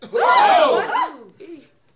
Small crowd being startled
alarm, audience, crowd, group, shock, startle, studio, surprise, theater, theatre